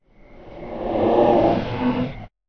Audacity:
- Effect->Reverse
- Effect->Change Speed
Speed Multiplier: 0.300
- Add Silence at end
0.02 s
- Effect→Normalize...
✓Remove DC offset
✓Normailze maximum amplitude to: –3.0
✓Normalize stereo channels independently